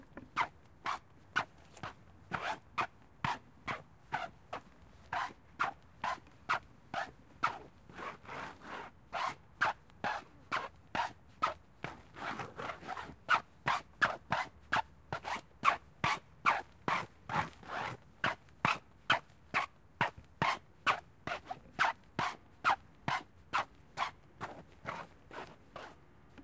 SonicSnaps-IDES-FR-feetdraggedonground
Someone wearing trainers dragging their feet on wet ground.
feet, France, ground, IDES, Paris